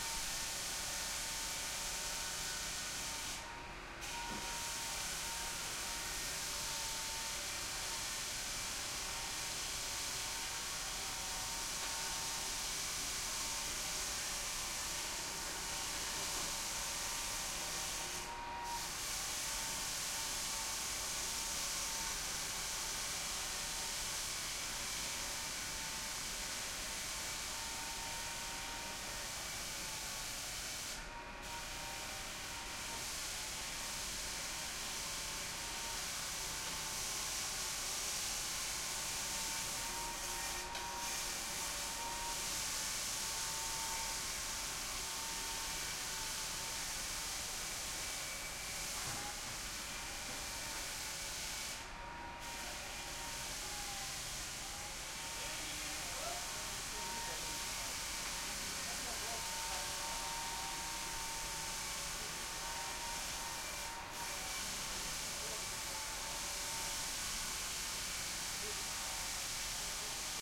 080912 00 grinder wood
wood, grinder